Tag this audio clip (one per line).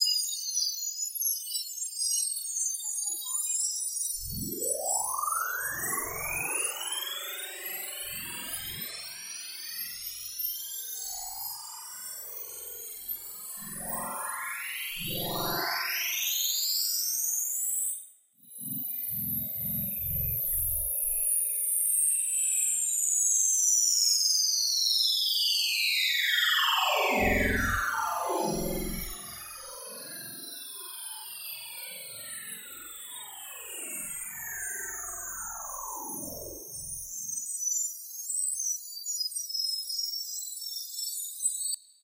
ambiance
ambience
ambient
atmosphere
background
bitmaps-and-waves
image-to-sound
rose-garden
sci-fi
soundscape